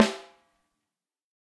For each microphone choice there are ten velocity layers. The microphones used were an AKG D202, an Audio Technica ATM250, an Audix D6, a Beyer Dynamic M201, an Electrovoice ND868, an Electrovoice RE20, a Josephson E22, a Lawson FET47, a Shure SM57 and a Shure SM7B. The final microphone was the Josephson C720, a remarkable microphone of which only twenty were made to mark the Josephson company's 20th anniversary. Placement of mic varied according to sensitivity and polar pattern. Preamps used were Amek throughout and all sources were recorded directly to Pro Tools through Frontier Design Group and Digidesign converters. Final editing and processing was carried out in Cool Edit Pro.